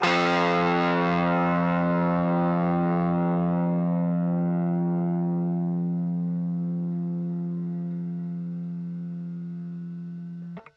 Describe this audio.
Two octaves of guitar power chords from an Orange MicroCrush miniature guitar amp. There are two takes for each octave's chord.
amp, chords, distortion, guitar, miniamp, power-chords